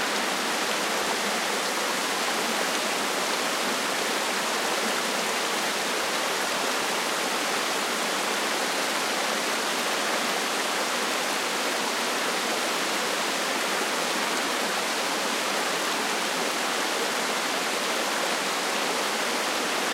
twinfallscreek03 falls
Creek in Glacier Park, Montana, USA
nature, water